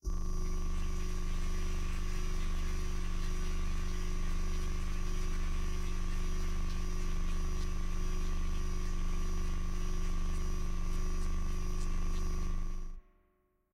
sci-fi drone